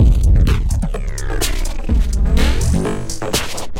mushroom disco 3
breakbeat, distortion, loop